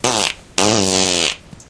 fart poot gas flatulence flatulation